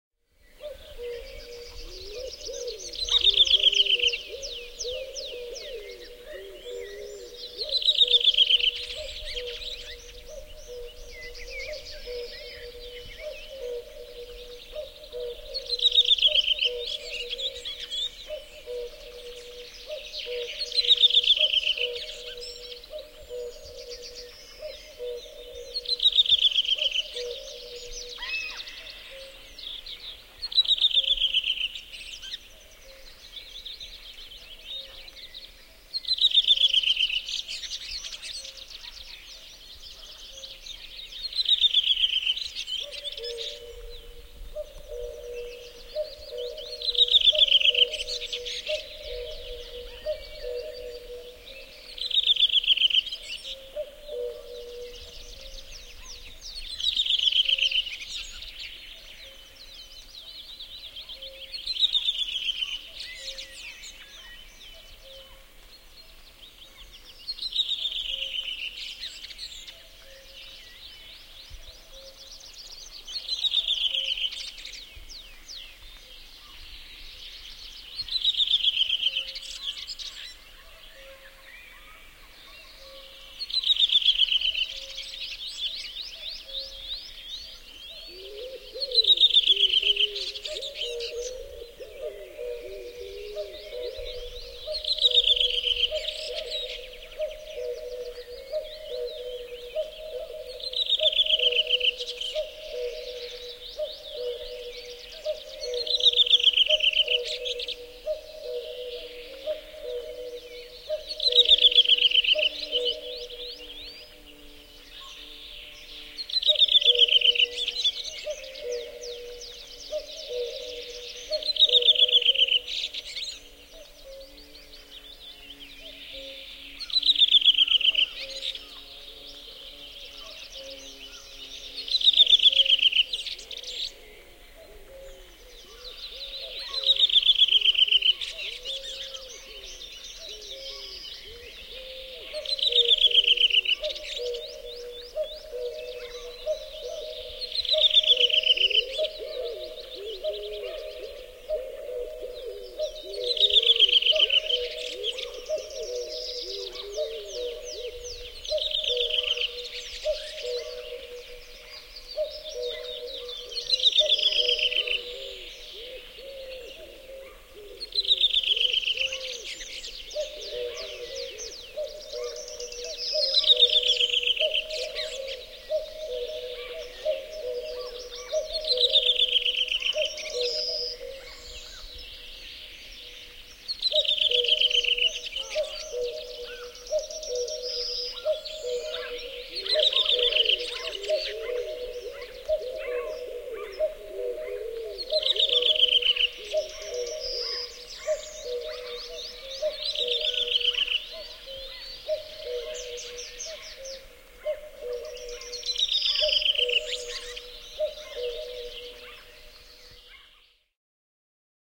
Linnunlaulu, lintuja metsässä / Birdsong, lots of birds singing lively in the woods in the spring, e.g. redwing, cuckoo, other birds in the bg
Linnut laulavat vilkkaasti metsässä, kevät, paljon lintuja, mm. punakylkirastas, käki. Muita lintuja taustalla.
Paikka/Place: Suomi / Finland / Kitee, Kesälahti
Aika/Date: 16.05.2002
Birds, Yle, Yleisradio, Linnut, Finland, Nature, Spring, Soundfx, Finnish-Broadcasting-Company, Field-Recording, Birdsong, Tehosteet, Linnunlaulu, Luonto